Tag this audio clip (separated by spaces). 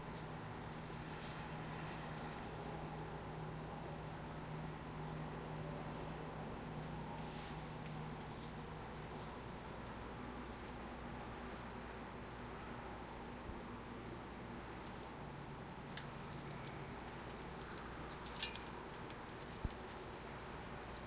ambient cricket field-recording nature